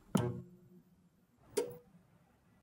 TV television, on off
Turning a television on and off
channel, click, off, television, TV